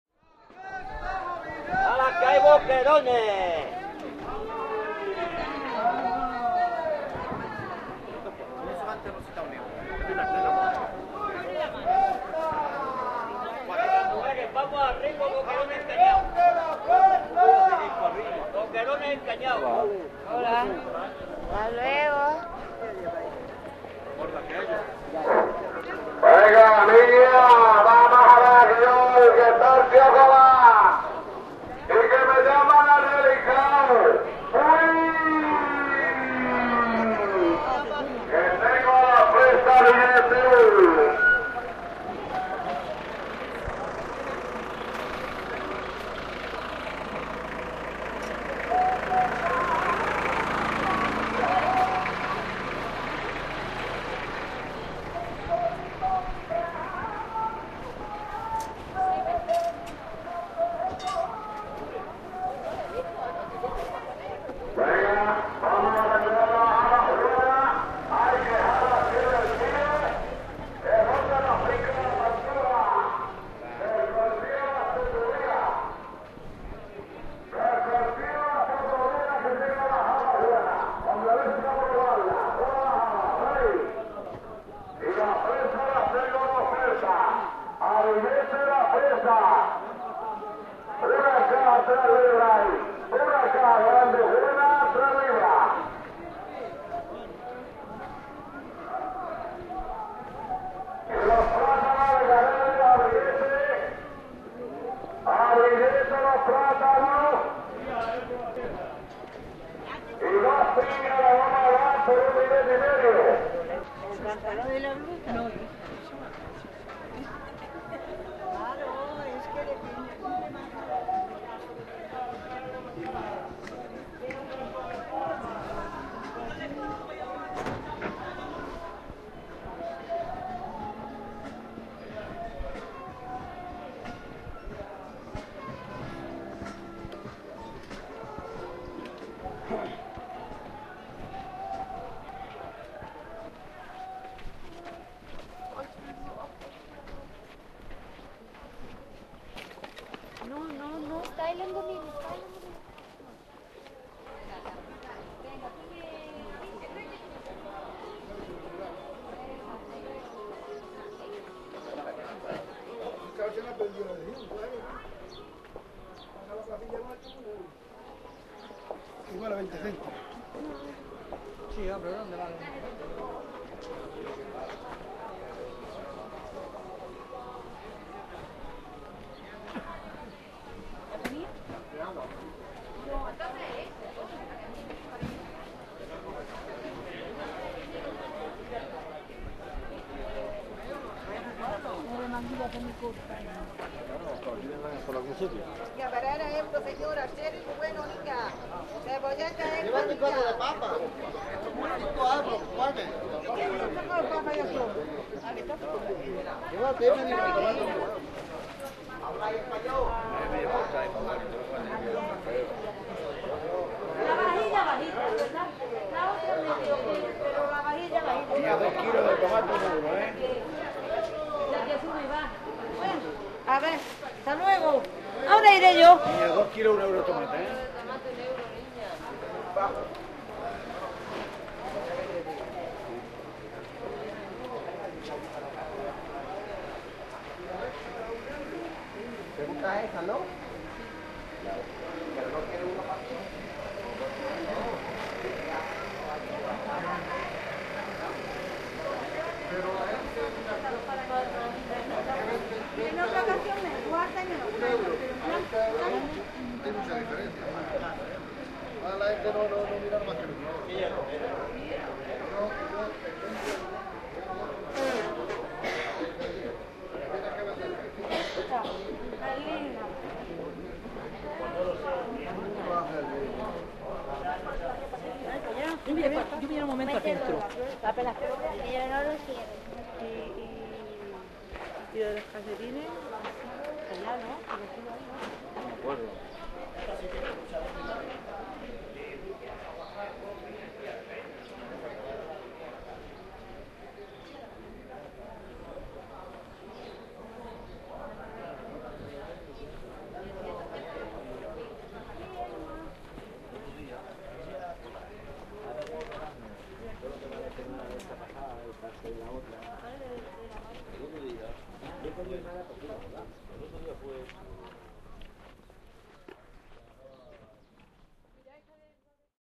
Recording made as I walked through the weekly Thursday market in Orgiva, Andalucia, Spain.
Recorded on Minidisc with a Stereo Mic